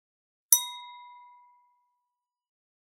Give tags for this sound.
hit; glass; sonorous; wood

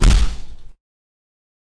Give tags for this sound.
face; slap; hard; punch; hit; violent; smack

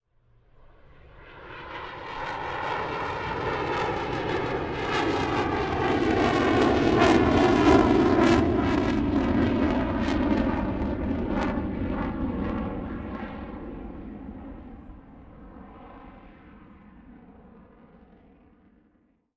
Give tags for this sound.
Fighter-Jet; Flight; Fuel; Jet; Manoeuvre; Plane; Stunt; Typhoon; War

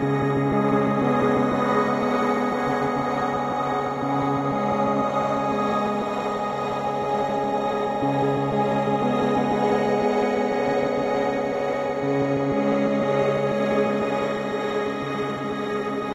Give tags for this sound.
ambient; atmosphere; echo; granular; loop; piano; quiet; rhodes; shimmer

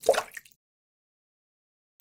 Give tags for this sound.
aqua; aquatic; bloop; blop; crash; Drip; Dripping; Game; Lake; marine; Movie; pour; pouring; River; Run; Running; Sea; Slap; Splash; Water; wave; Wet

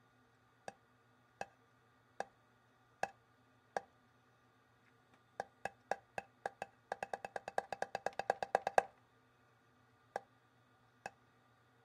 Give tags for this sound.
foley; cartoon; walking; mouse

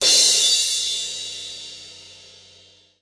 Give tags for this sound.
Cymbal; B8; 18; Crash; Hit; inch

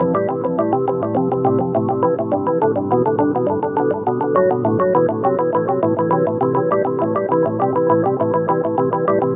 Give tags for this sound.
arp; delay; happy; m7-chord; loop; organ

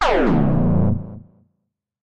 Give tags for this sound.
digital; electronic; energy; laser; lazer; resonant; sci-fi; space-war; sweep; weapon; zap

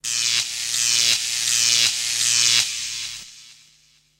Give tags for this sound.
Electric; elektrik; techno

